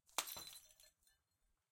1 light, high pitch beer bottle smash, hammer, liquid-filled